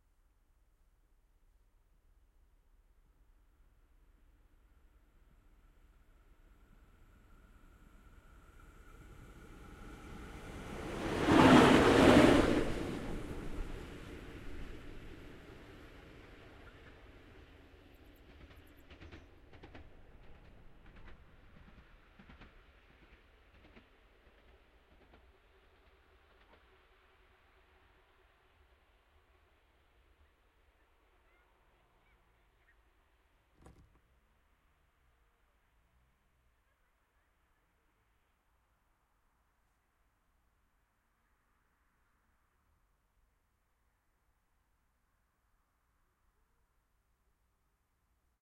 Train Passing By Medium Fast Speed L to R Night Amb
Multiple takes of a train passing by.
Locomotive, Railway, Field-Recording